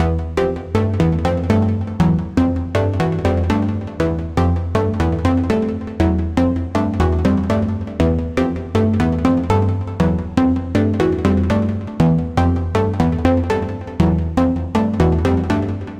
lead loop 7001028 120bpm
lead loop 120bpm